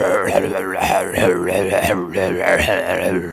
Incomprehensible Evil Voice Loop
Incomprehensible voice loop for a cartoon baddie, maybe in SuperTuxKart?